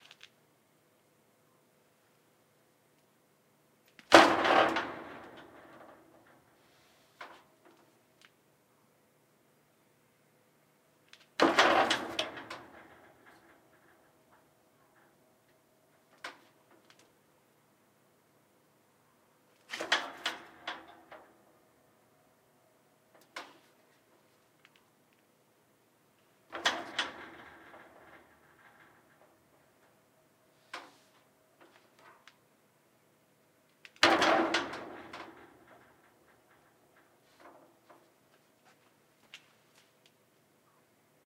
Body Hit On Metal Fence 2
The sound of a body/a person slamming against a metal fence. Recorded outdoors.
crash, fence, impact, thud, ting